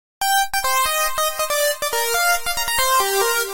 Dance Pt. 4
beat, melody, progression, sequnce, synth, techno, trance